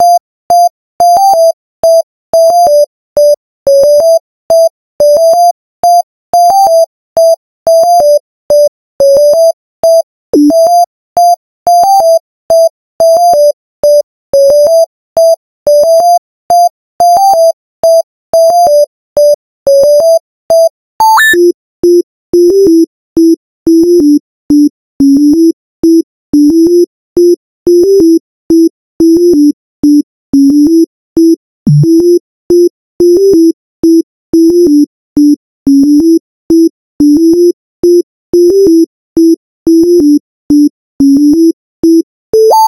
Bitcrushed Melody Dry
This is the original melody I made with FL Studio With 3 osc.
90 BPM.
32 bars long.
Injoy.
Sine-wave, Melody, Bitcrushed